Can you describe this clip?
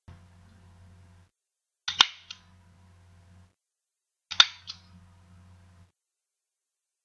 Sound of paper stapler
foley, stapler